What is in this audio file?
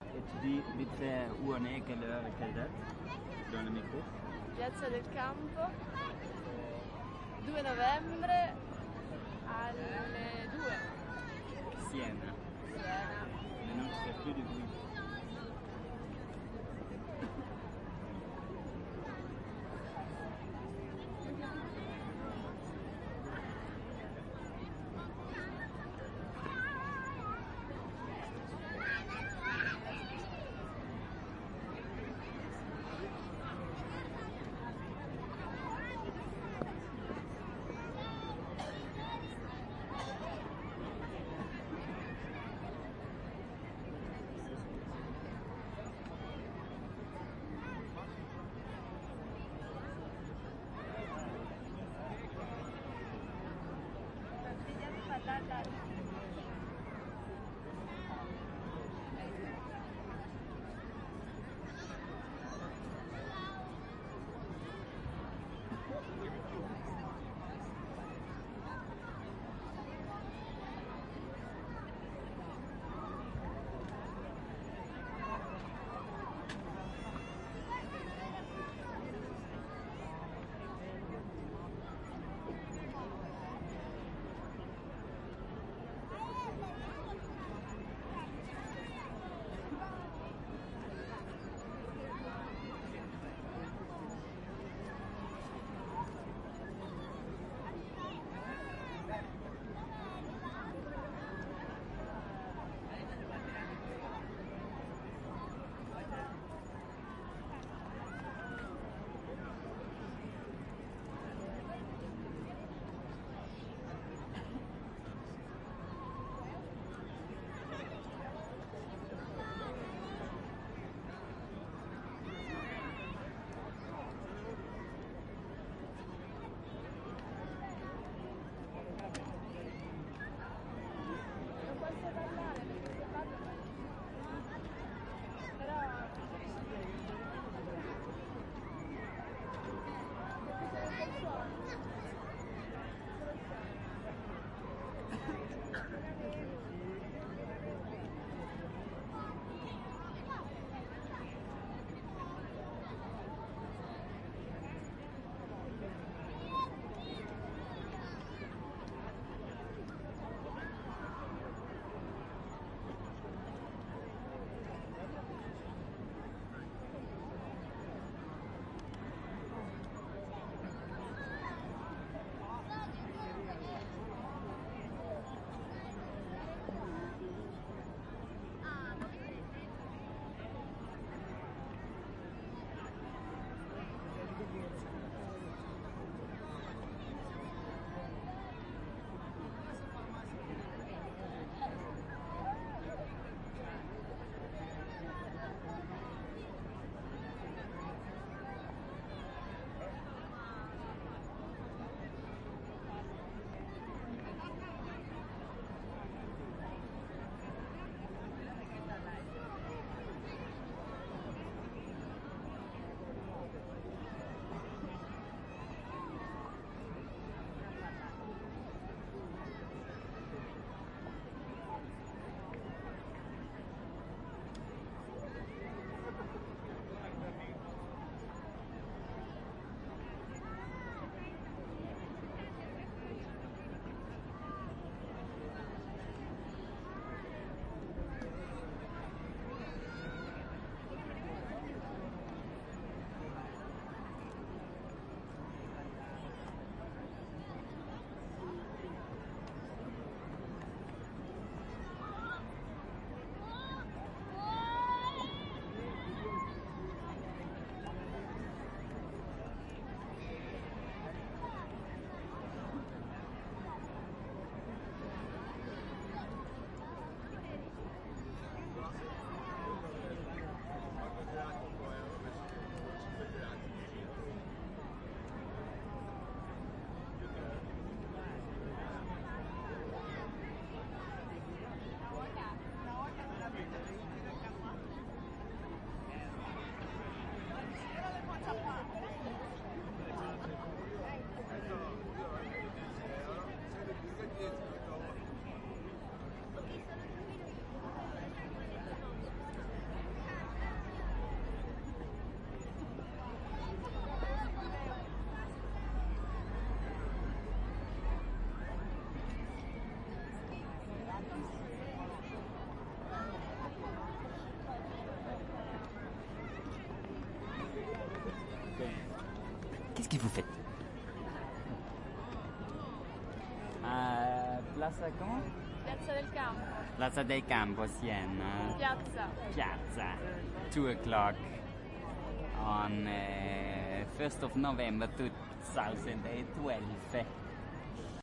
2012-11-02 AMB - SIENA PLACA del CAMPO
Placa del Campo Italy Siena Ambience Field Recording
Field - Recording, AB mkh20 Sennheiser
Recording, Placa, Italy, Campo, Field, Siena, Ambience, del